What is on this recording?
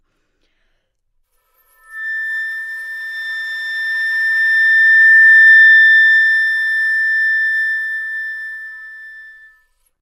Part of the Good-sounds dataset of monophonic instrumental sounds.
instrument::flute
note::A
octave::5
midi note::69
good-sounds-id::224
Intentionally played as an example of bad-dynamics